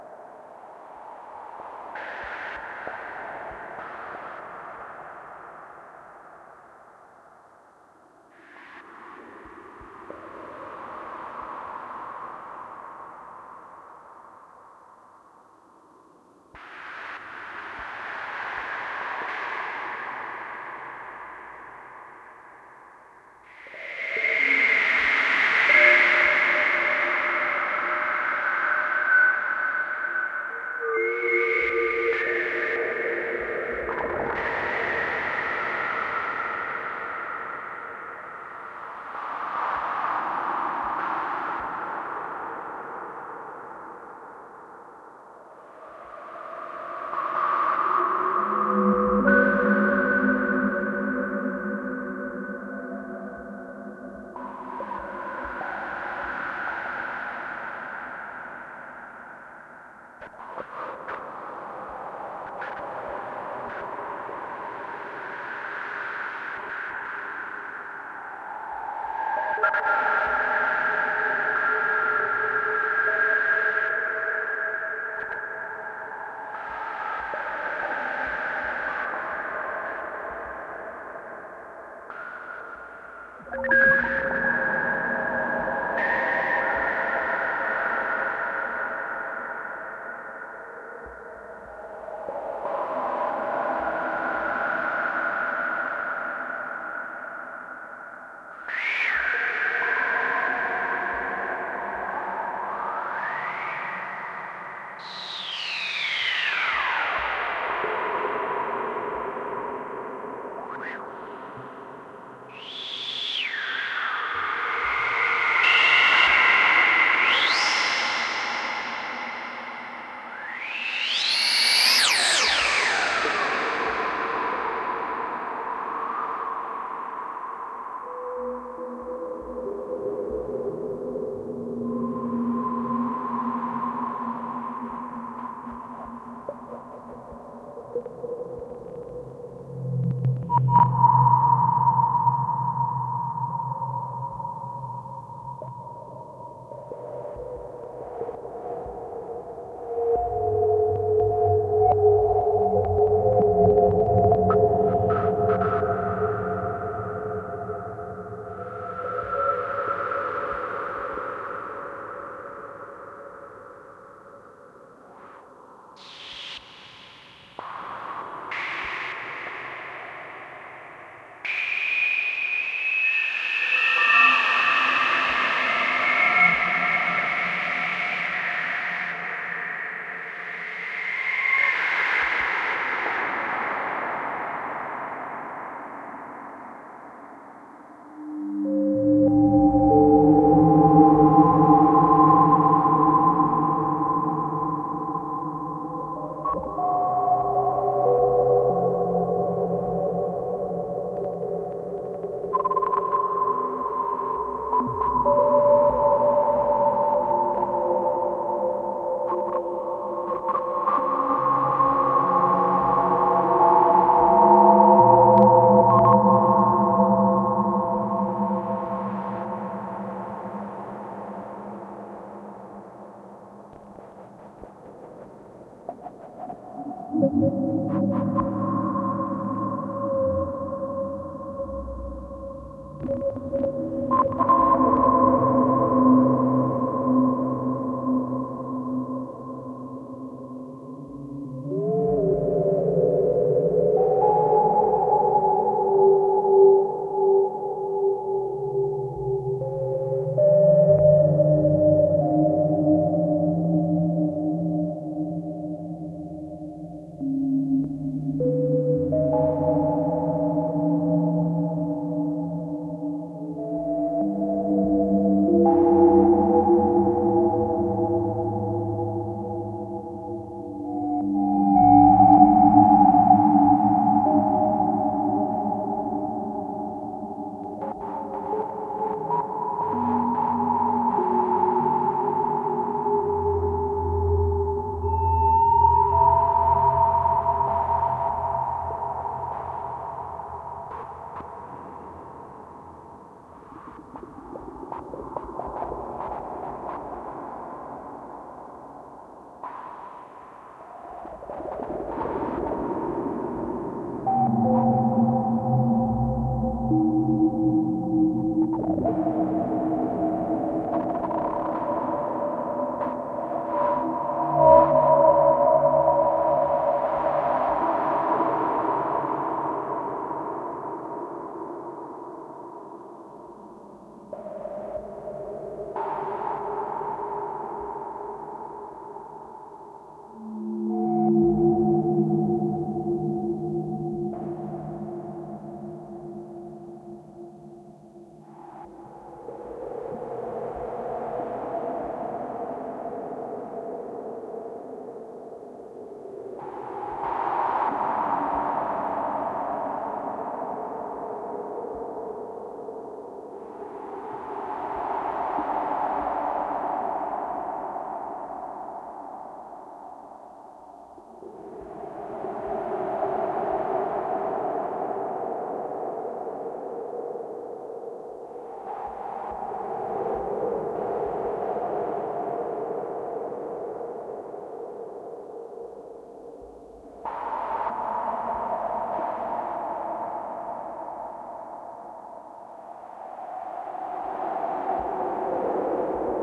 looming Abyss
recorded from one of my synths...
ambiance, ambience, ambient, atmo, atmos, atmosphere, atmospheric, background, background-sound, general-noise, phantom, soundscape, Synth, white-noise